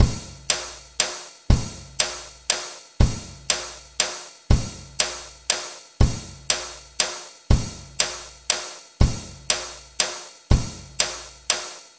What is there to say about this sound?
A drum pattern in 3/4 time. This is my second pack.